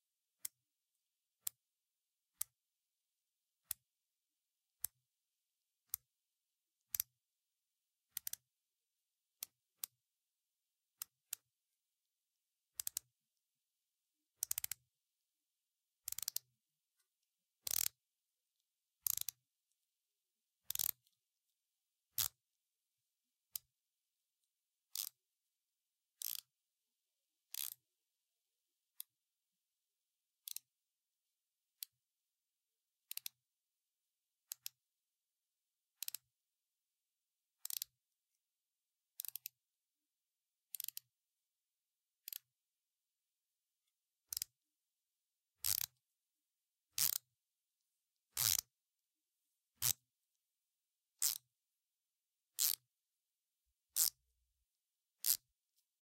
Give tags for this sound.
click; machine; insulin; ratchet; tools; gear; twist; plastic

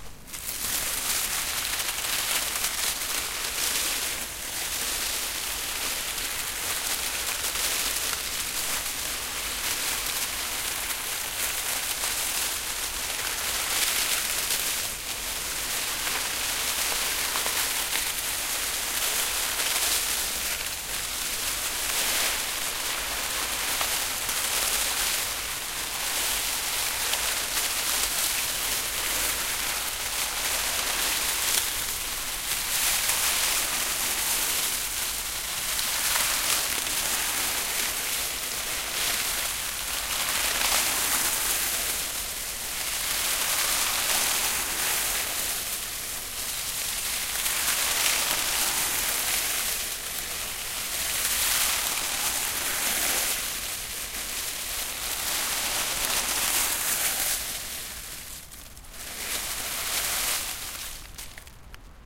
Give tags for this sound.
autumn; nature; leaves; field-recording

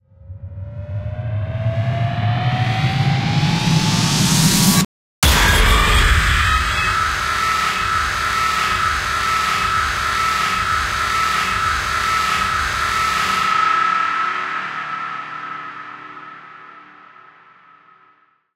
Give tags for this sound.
Halloween; prop; animated; build; pneumatic; spooky; trigger; scream; fright; creepy; animatronic; speaker; jumper; audio; horror; jump-scare; sound-effect; scare; loud; remix

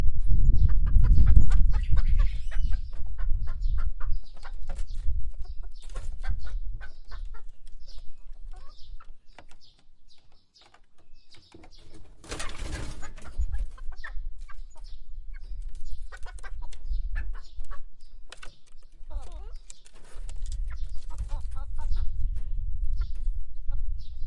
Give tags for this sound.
Chicken; Korea; Animal

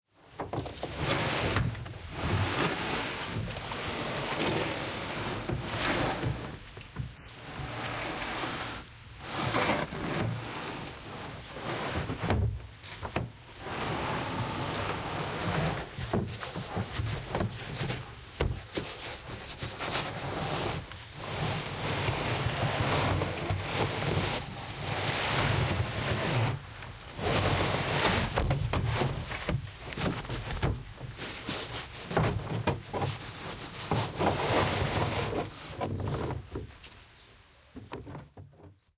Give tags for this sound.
boat; cannoe; dirt; dragging; gravel; heavy; kayak; moving; narrow-boat; pull; row; row-boat; sand; scrap; scraping; sof

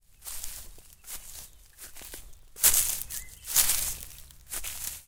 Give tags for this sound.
ambient; bird; crack; forest; grass; ground; meadow; step; stomp; walk

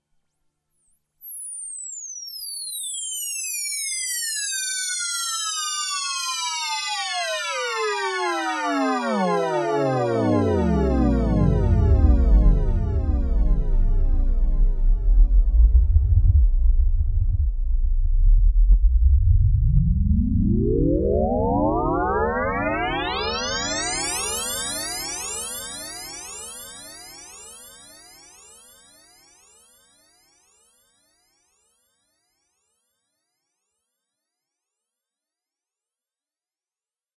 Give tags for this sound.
jen; jen-sx-100; keyboard; moog; prog-rock; radiophonics; retro; synth; sythesizer; vintage; workshop-experimental

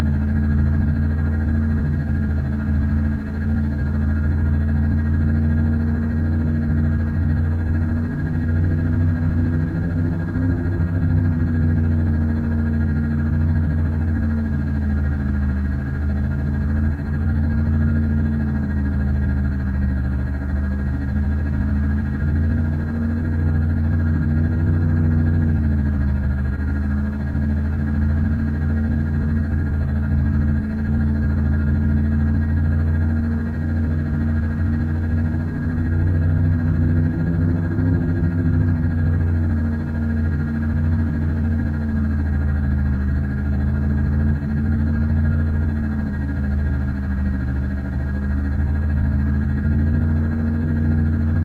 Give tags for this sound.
spaceship; engine; idle; scifi

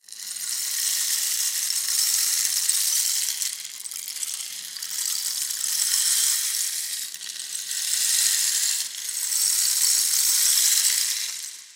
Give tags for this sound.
rattling; shaker; percussion; rattle; cascade; crackling

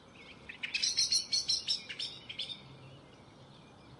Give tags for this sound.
birdsong; field-recording; birds

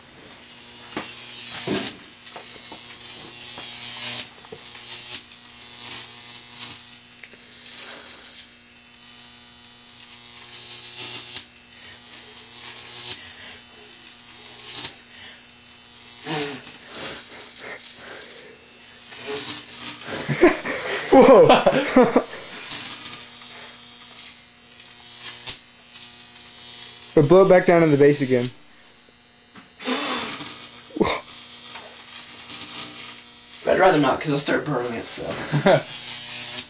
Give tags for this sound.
arc; buzz; flickr; unprocessed; spark; zap; electricity; fx; effects; electrical; shock; electric; crackle

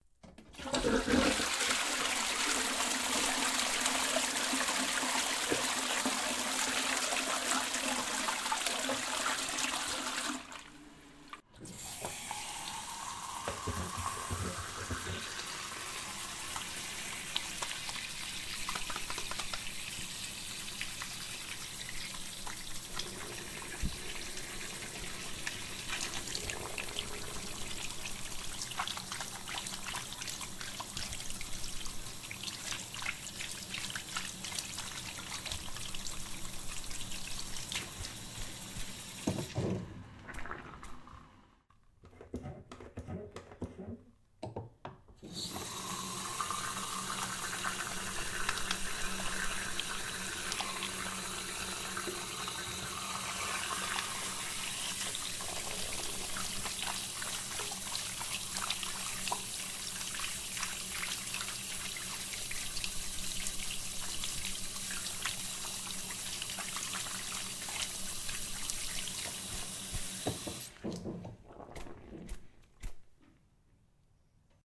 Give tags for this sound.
Hands; Water

